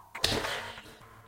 When your hero punches back!
attack, fight, heavy, intense, punch, reverb